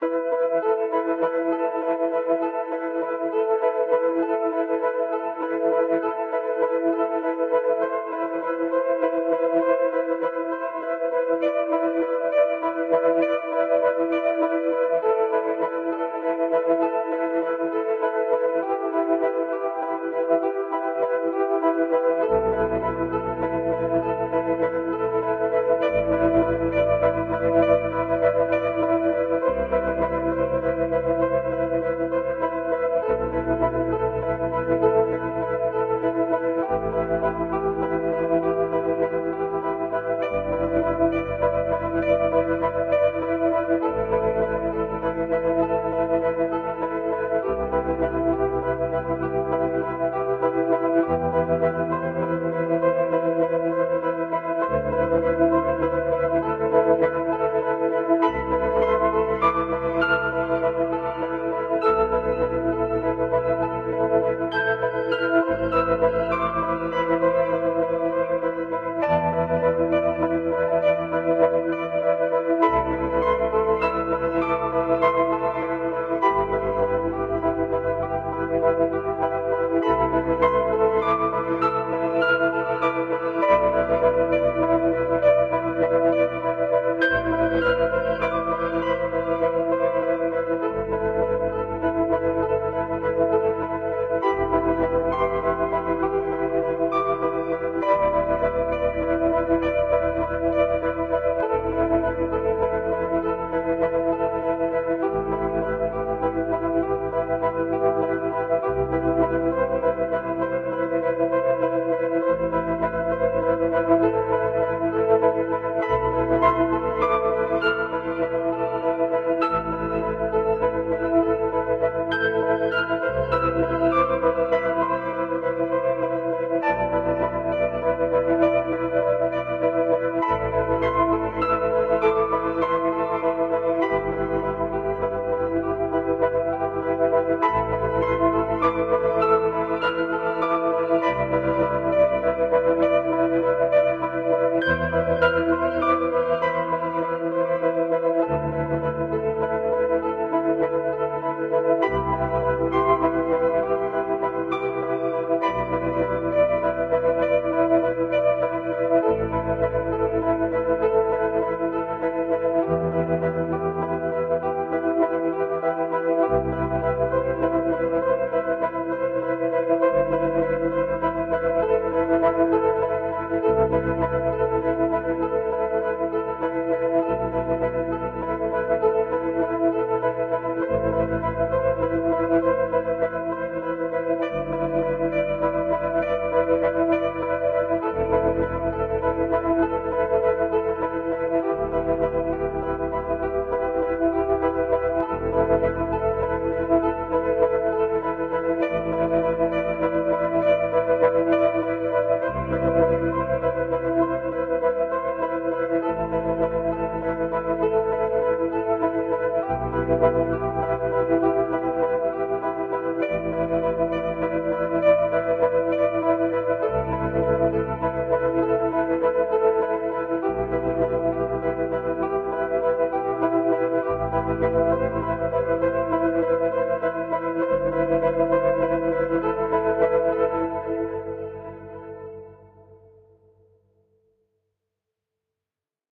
Desert ambient music
I recently came across a weird instrument on FL-studio that really captivated me. It is a mix between a synth and a guitar (in my opinion) and I liked the mood of it. What resulted with experimenting is this weird desert like ambient music that can be looped if neccesary.
Made with FL studio 21.
ambience, ambient, atmosphere, cinematic, dark, desert, drone, mood, music, processed, sci-fi, synth, vibe, weird